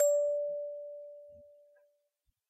clean re 2
eliasheunincks musicbox-samplepack, i just cleaned it. sounds less organic now.
clean, metal, musicbox, note, sample, toy